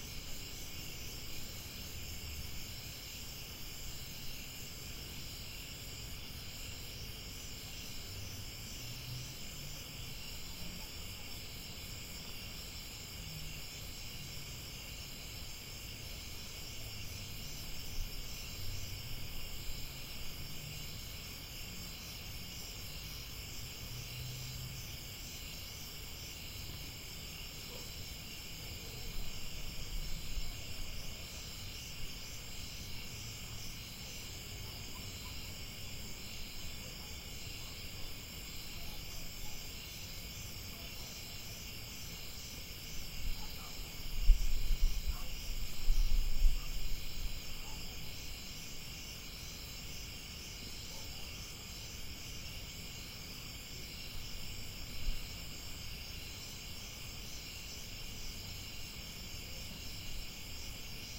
Dewe at Night
This recording was taken around 10.30pm near a village called Dewe in Uganda. Dewe is a village in Uganda about 100 yards from the shores of Lake Victoria.
I was fortunate enough to spend a month staying with a project called the Dewe School of Art based in the village. I took this recording while I was alone in the project gardens at night.
I have not processed or tampered with the sound in any other way than slightly amplifying the original. Surround recording on Zoom H2.
natural, night, uganda, zoom